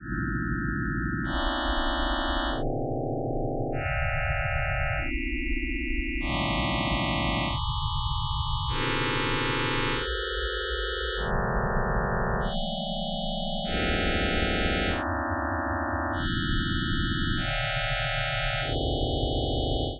More melodic sequences and events created with graphs, charts, fractals and freehand drawings on an image synth. The file name describes the action.
dance, space, sound, loop